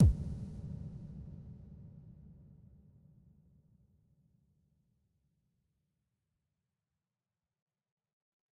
Decent crisp reverbed club kick 4 of 11